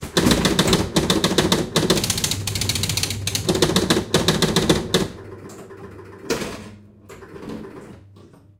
pinball-backbox scoring mechanism in action
Backbox scoring mechanism of a 1977 Gottlieb Bronco Pinball machine. Recorded with two Neumann KM 184 in an XY stereo setup on a Zoom H2N using a Scarlett 18i20 preamp.
pinball, Scarlett-18i20, bar-athmosphere, gameroom, Focusrite, bronco, plunger, Neumann-KM-184, Zoom-H2N, arcade, flipper, Gottlieb, bumper, game